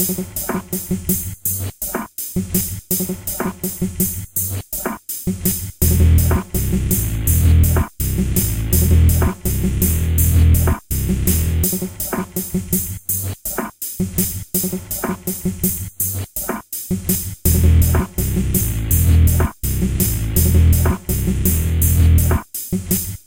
Mother-Accelerated
Simple dub beat intro.
~ WindTryst Farm Lat: 40.84985724428507
................... Lon: -77.86968290805817